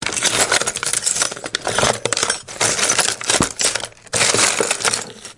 A sound effect of searching for keys